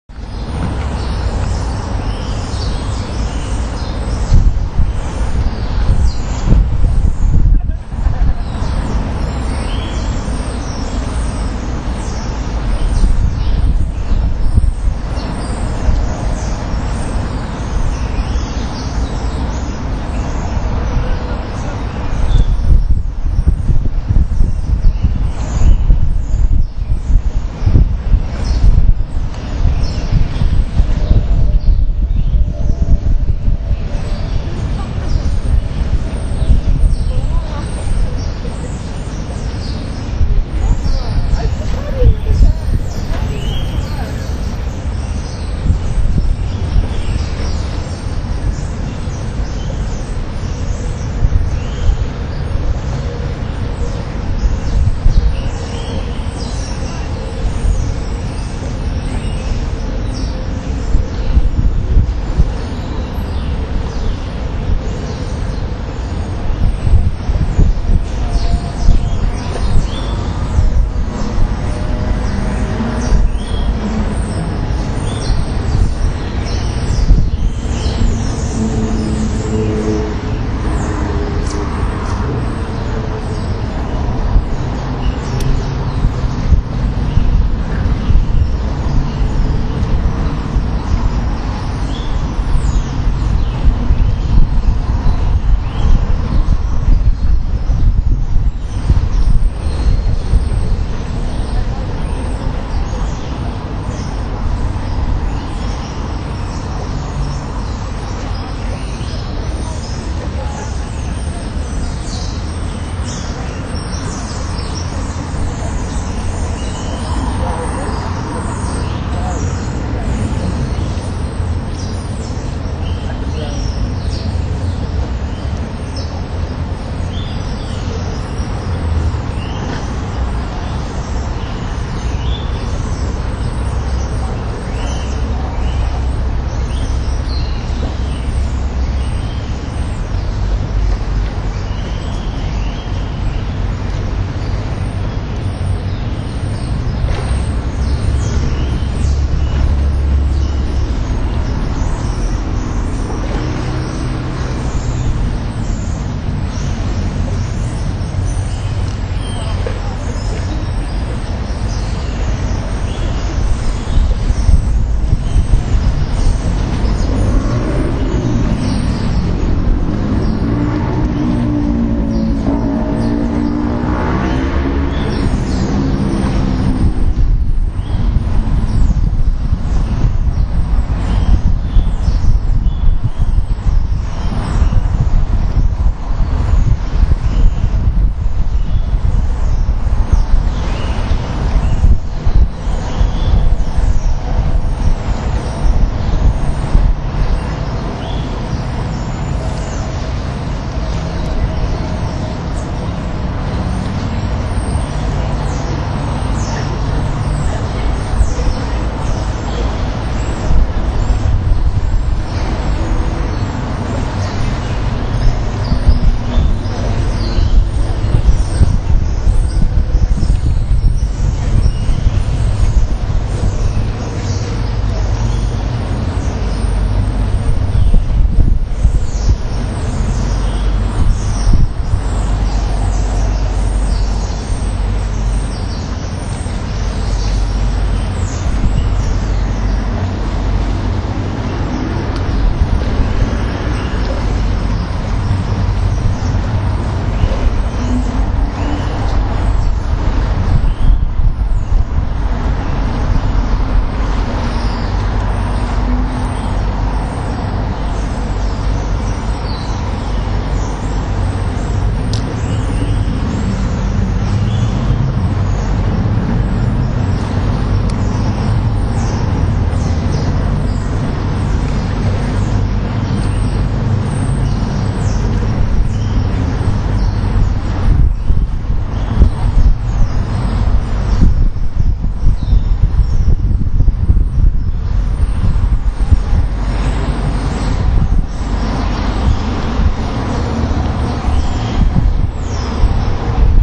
It is dusk on an autumn evening on the south side of the river Thames, a few feet downstream from Vauxhall Bridge. Innumerable starlings have congregated in a murmuration underneath (in fact inside the fabric of) the bridge to noisily discuss the day before sleep. Overhead, cars and buses thump across the bridge panels, while small groups of city workers, nurses and spies head home or for drinks. All the while the river laps away as an unconscious heartbeat, and is churned up by the thrum of a passing riverboat.
Birds, Boat, Cars, Field-recording, River, Thames